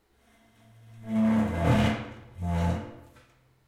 Queneau Grince Chaise Table 03
frottement grincement d'une chaise sur le sol
chair, classroom, desk, drag, dragging, table